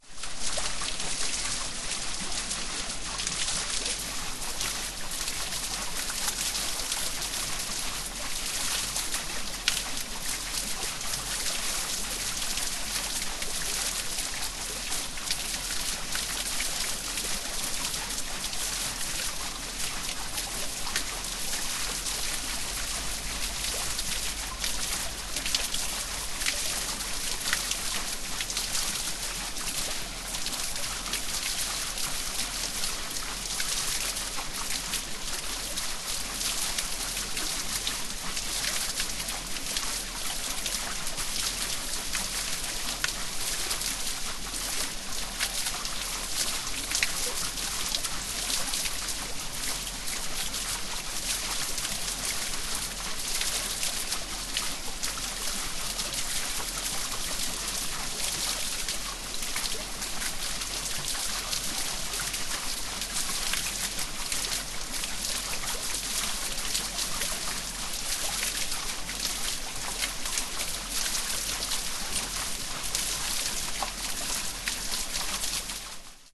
Wheelsound of an original Black Forest water mill
Black, Forest, Germany, Mill, Nature, Water